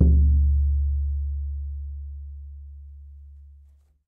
African Drum7
Single shot on african hand drum.
african, drum, handdrum, perc, percussion, skindrum